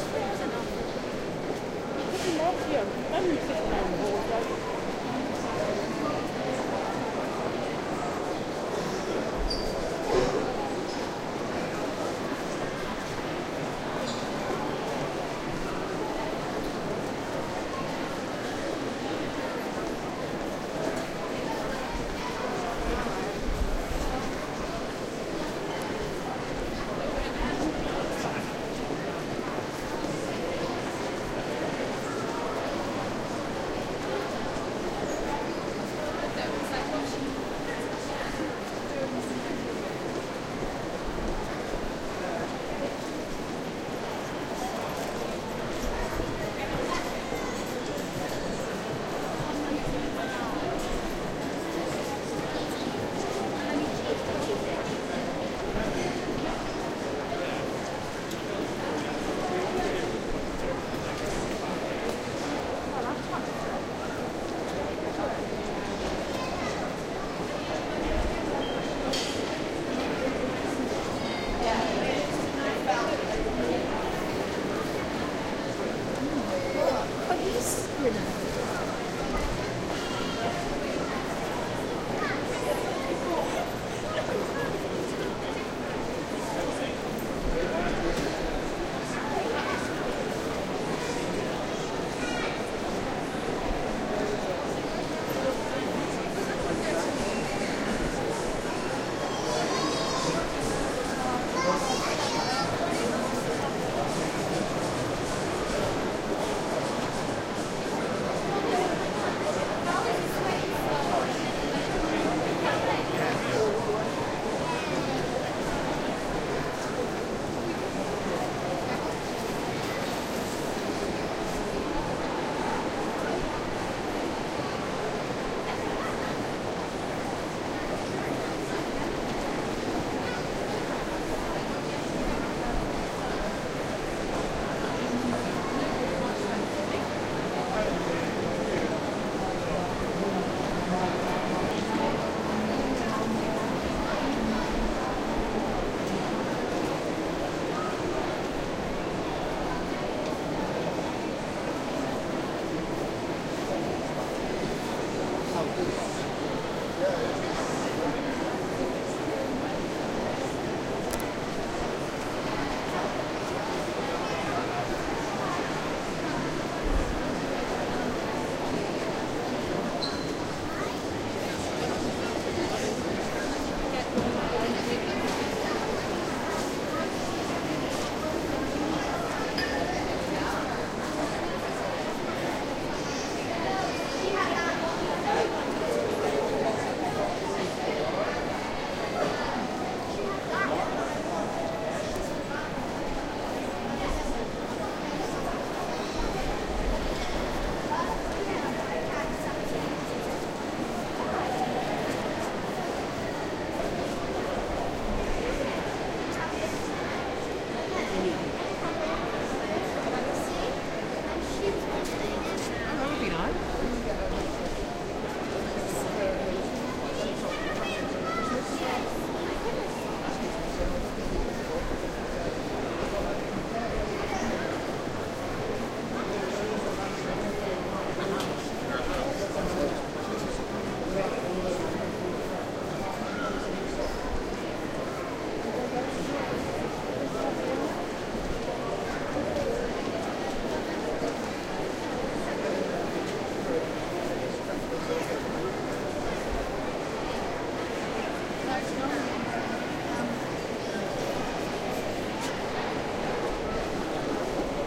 Mall Ambient
Ambient sound from a busy pre-Christmas shopping mall in Bristol, England. This was recorded on the move - a full circuit of the main concourse - on a Zoom H2. It's pretty much untouched although I've cut out most of the more legible conversations as people walk close to the recorder and a few bumps and clicks.